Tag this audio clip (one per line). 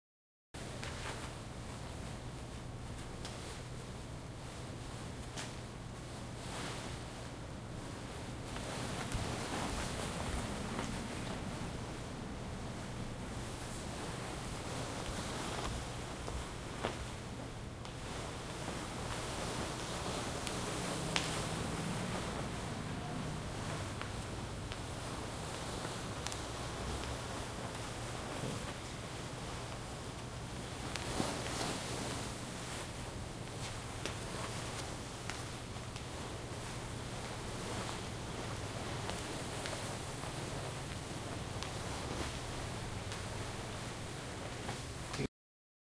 walking
textile
walk
dress
fabric
clothing
shoes
rustling